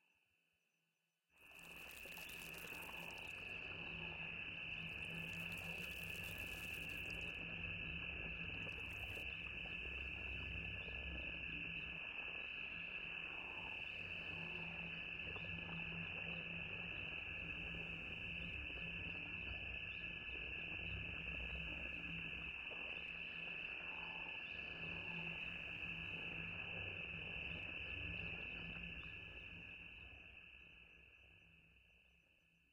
Bio LIfe Signs 2
world, alien, atmospheric, synthetic, squelchy, jungle